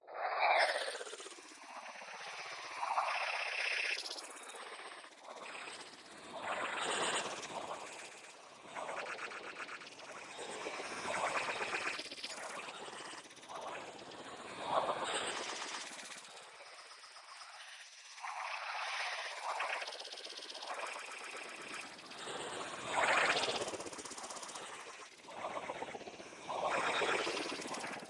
Ambience AlienHive 00
An otherworldly ambient looping sound to be used in sci-fi games. Useful for creating an alien environment where there are strange creatures all around you.
alien, ambience, atmospheric, futuristic, game, gamedev, gamedeveloping, games, gaming, high-tech, indiedev, indiegamedev, science-fiction, sci-fi, sfx, soundscape, video-game, videogames